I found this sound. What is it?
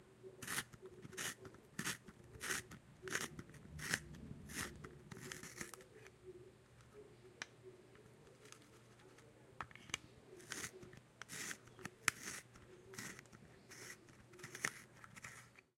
Pencil Sharpener
Recording of pencil being sharpened
writing, eraser, sharpener, office, pencil, school, draw